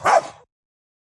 A dog barking
anger, angry, animal, animals, bark, barking, barks, big, dog, dogs, field-recording, fight, fox, growl, growling, howl, pet, pets, snarl, wolf, wolves, woof